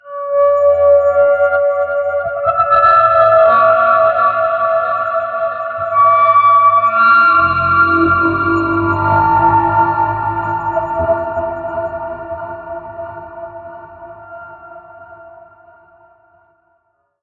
Samurai Jugular - 02
A samurai at your jugular! Weird sound effects I made that you can have, too.